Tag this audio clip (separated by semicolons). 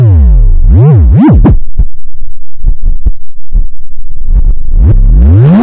processed; electronic